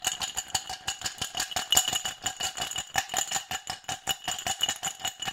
Ice Cubes Glass Shake 03
Ice cubes being shaken in a glass
glass, ice-cubes, restaurant, shake